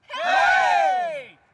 Group of people - Screaming Yeaaaah - Outside - 04
A group of people (+/- 7 persons) cheering and screaming "Yeeaah" - Exterior recording - Mono.
people, Group, cheering